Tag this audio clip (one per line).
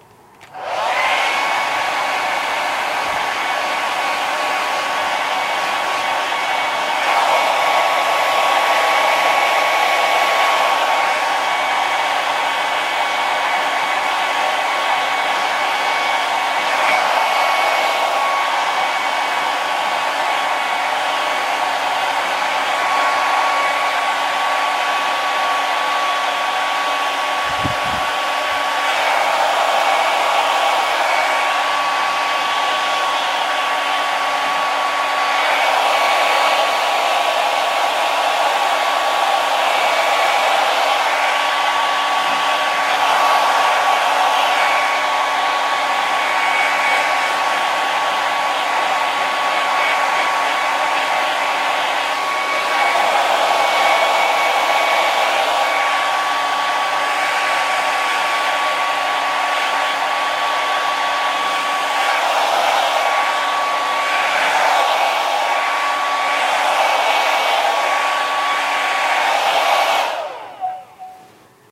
dry
fan
motor
hairdryer